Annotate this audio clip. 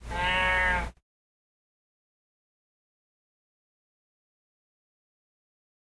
The moo sound from a cow.
animal, cow, farm, moo